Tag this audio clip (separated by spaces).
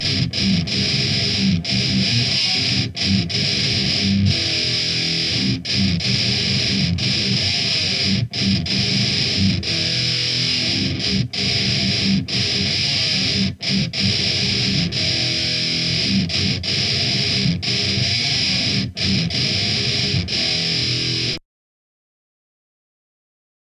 190 bpm groove guitar hardcore heavy loops metal rock rythem rythum thrash